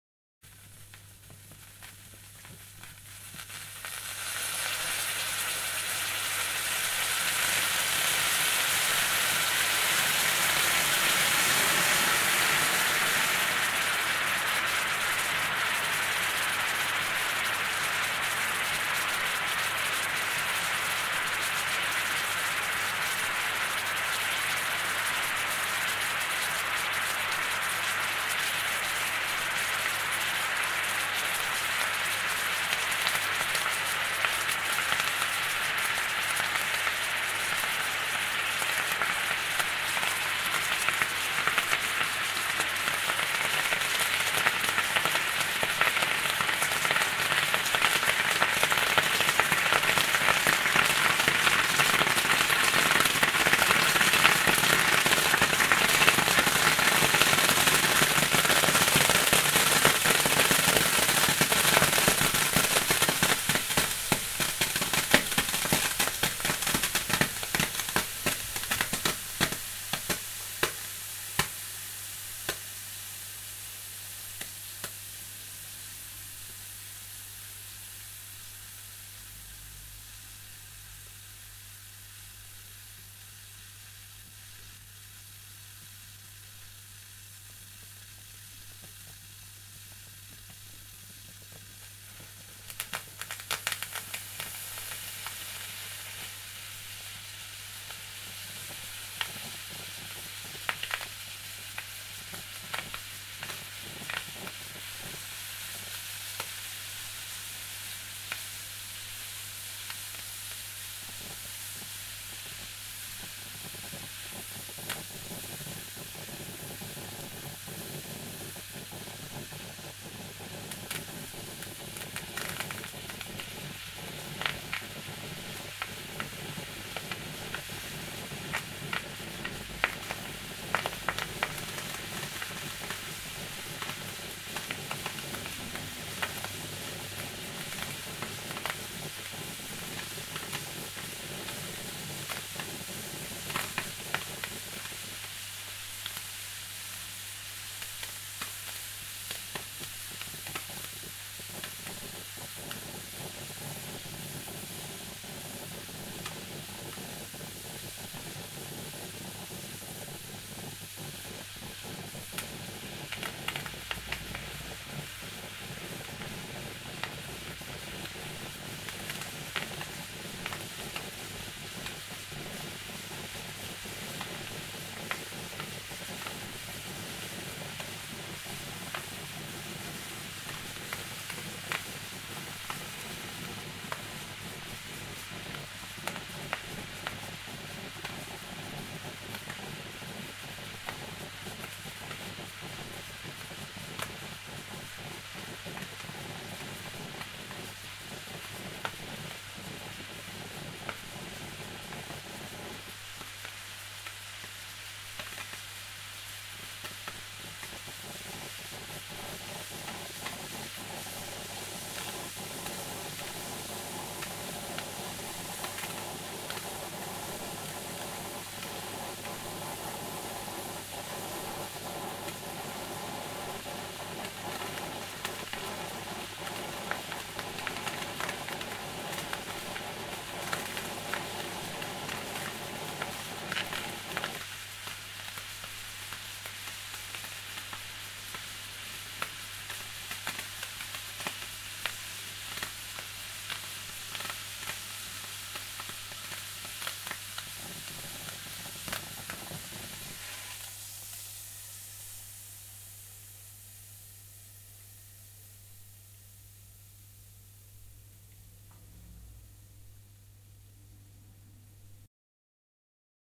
Saucepan boiling over
A saucepan (with a lid) boiling over onto a hot stove. The sound comes and goes as water jumps out of the pan onto the cooker, then evaporates away.
Kitchen, saucepan, cooking